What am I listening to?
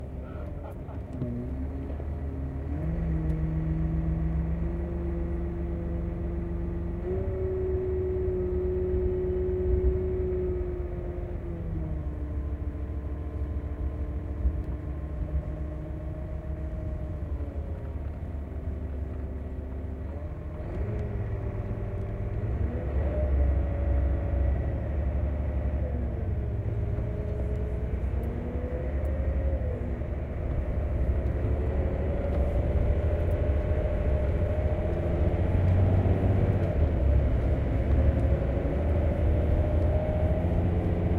truck-inside-04
Inside the cabin of a haul truck, filled with sand, driving.
fieldwork, loader, transport, gears, inside, driving, transportation, haul, volvo, lorry, truck, sand, cabin, digging